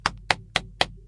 Tapa nas Costas
O som representa uma pessoa socando outra nas costas, e foi gravado com um microfone Condensador AKG C414
4maudio17, back, corporal, friendly, lid, uam